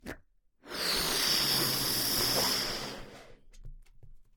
Recorded as part of a collection of sounds created by manipulating a balloon.